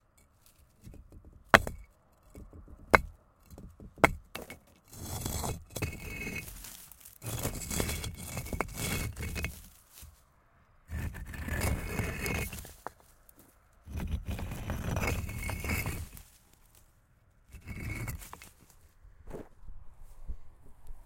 Brics grinding together